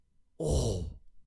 Exclamation of "Ohhh!" in sort of disagreeing way.
sfx, disagree, gamesound, effects, sound-design, sounddesign, short, vocal, strange, man, cartoony, foley, shout